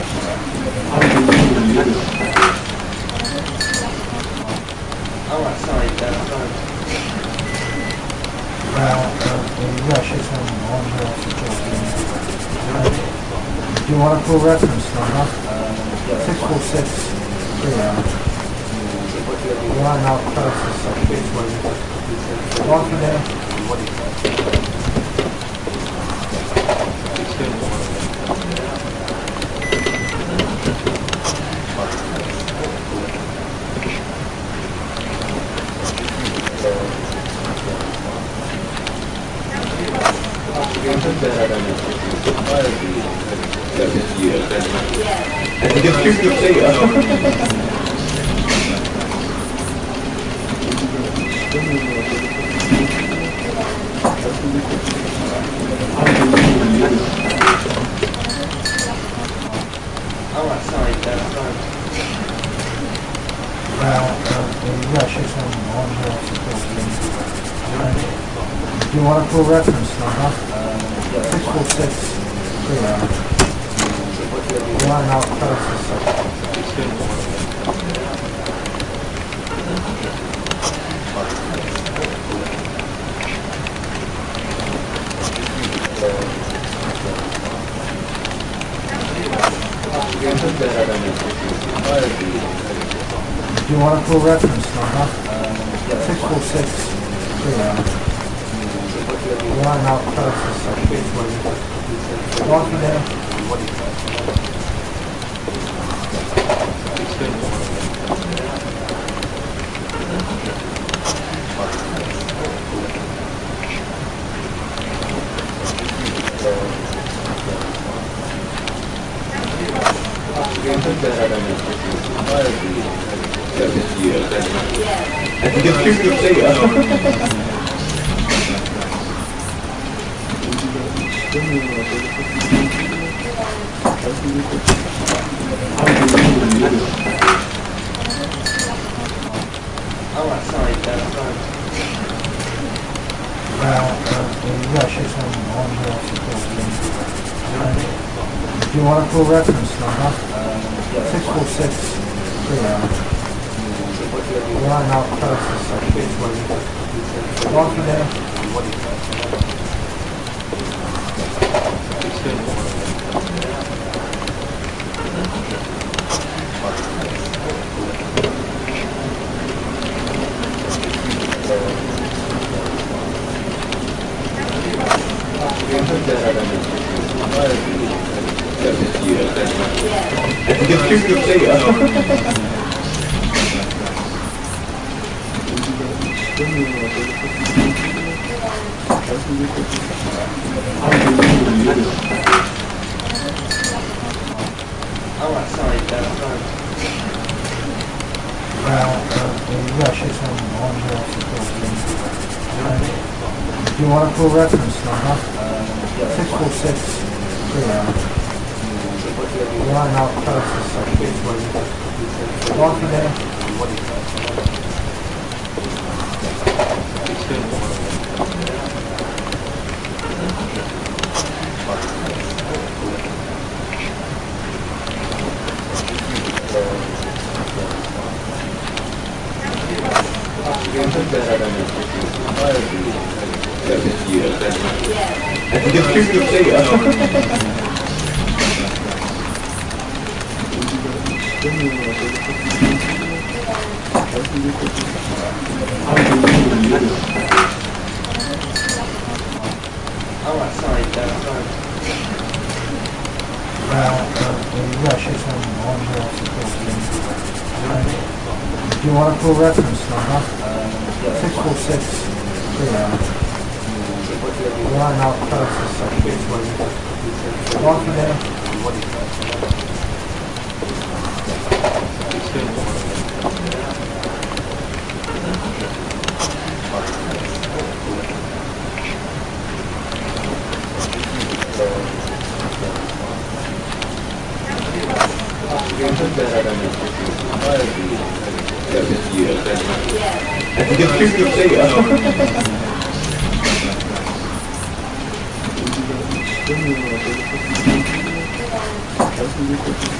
Office Ambience
Printer printing, people talking, writing with a pen, a/c running constantly.
An ambience in an office that loops. Modeled in Audacity.
Sounds used: